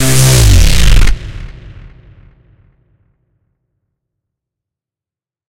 Reece Drop 2
Closed, Vermona, House, Sample